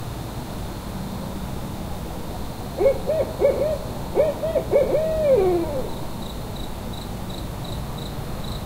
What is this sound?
Owl hooting, accompanied by crickets. Recorded Apr-22-2012 in Arkansas.
Are owls an evil omen?